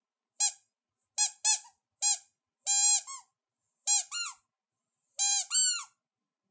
Squeeking dog toy,OWI
Squeaking dog toy
dog, squeak, toy